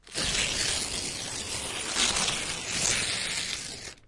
rustle.paper Tear 8
recordings of various rustling sounds with a stereo Audio Technica 853A
scratch, rustle, noise, cruble, rip, tear, paper